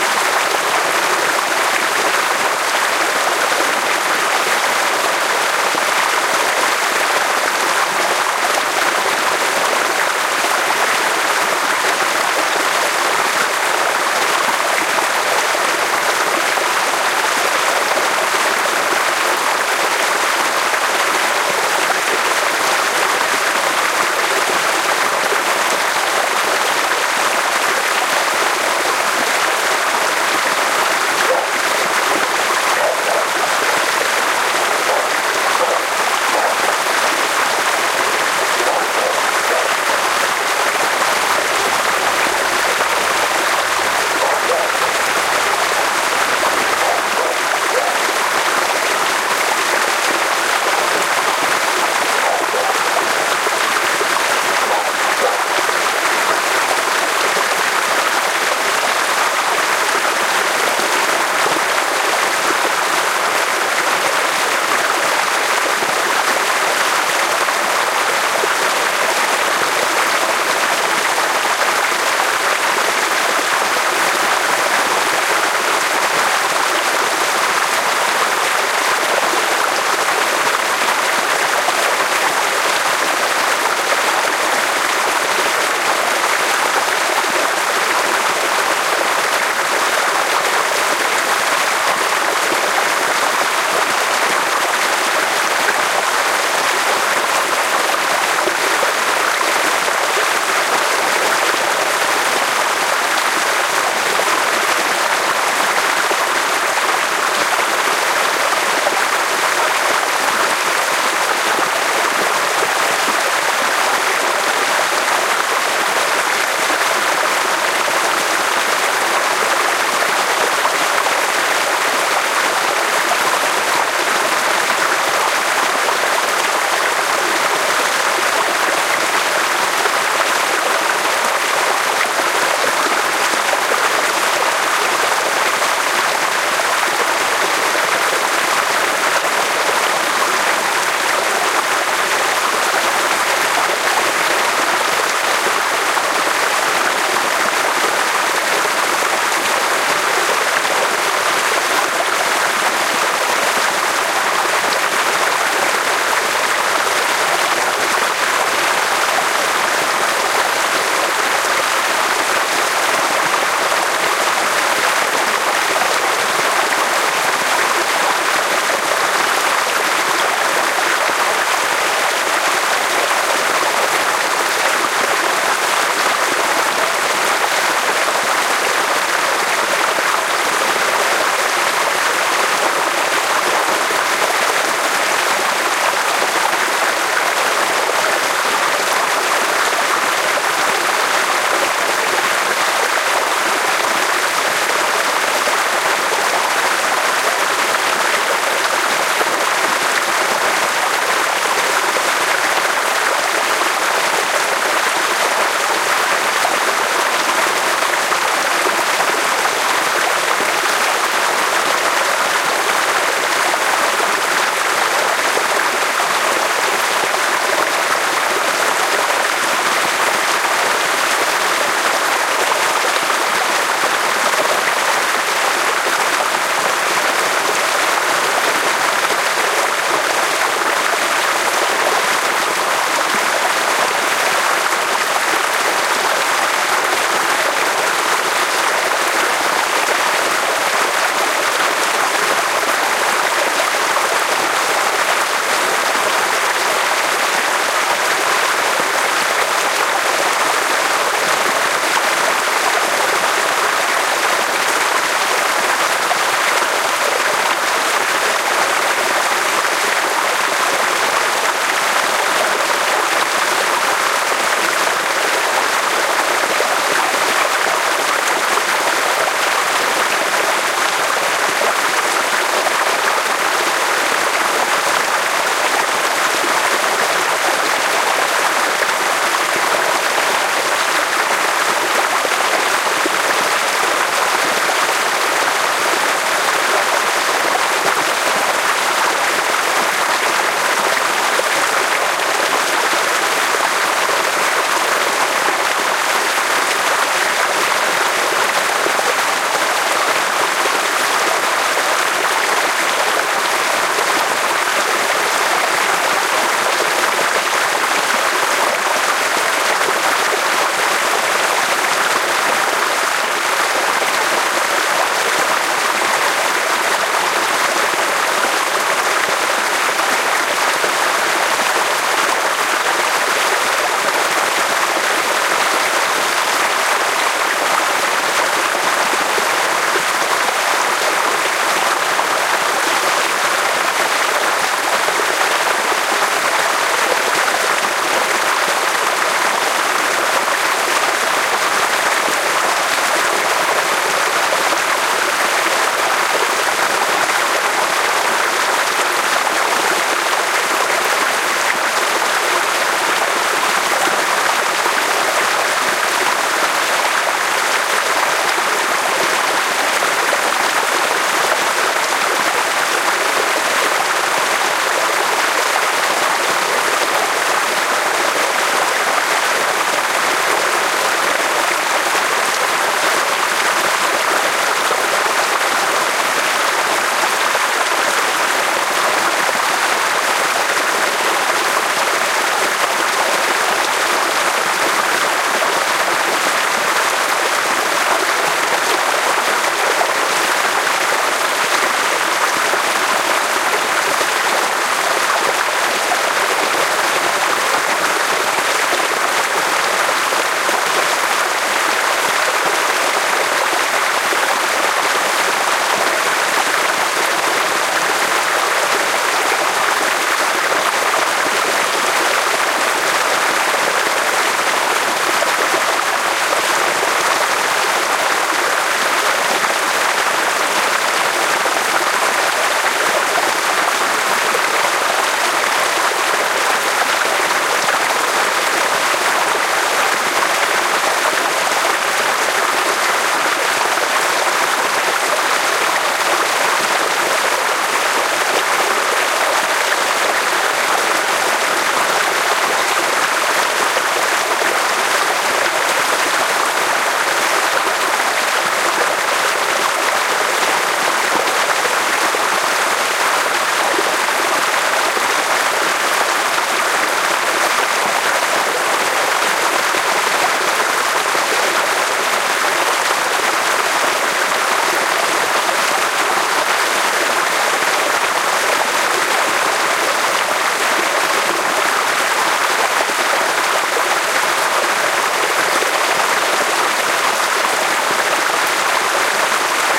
Eight minutes of flowing water (close-up), with small dogs barking every now and then. The title of the sample 'acequia' is the Spanish word for a community operated waterway used for irrigation. Recorded at night near Carcabuey (Cordoba, S Spain) with a pair of Shure WL183 mics, Fel preamp, and Olympus LS10 recorder